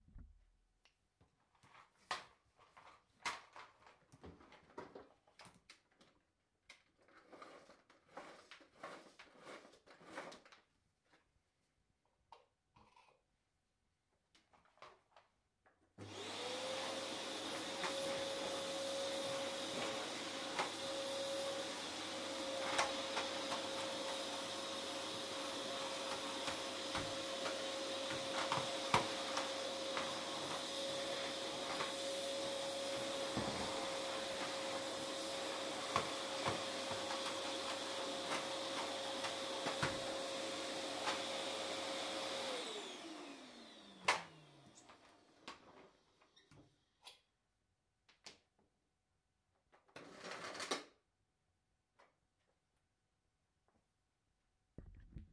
A Vacuum cleaner being switched on, moved around and finally switched off.
cleaning, noice